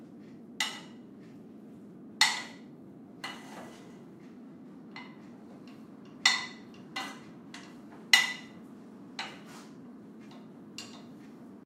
FX - manipular objetos de cocina 4
food, kitchen